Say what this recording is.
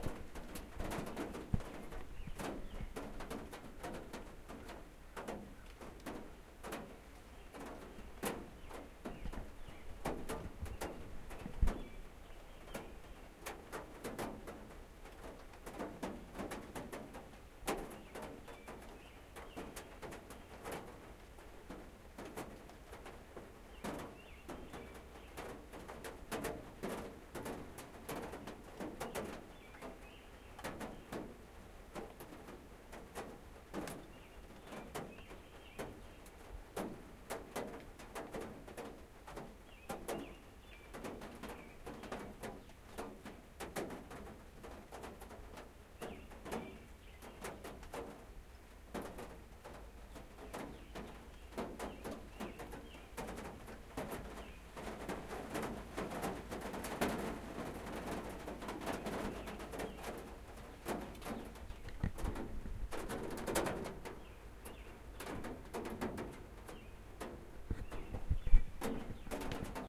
Recorded a short clip of rain on the roof of my van.
Recorded with an H4N